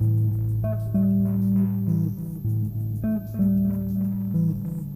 a sequenced loop of a Korg Poly800 recorded to reel-to-reel tape and then sampled and looped with a k2000